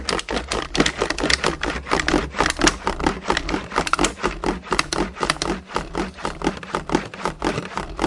Mysounds HCP Simon bottle
This is one of the sounds producted by our class with objects of everyday life.
France, Mysounds, Theciyrings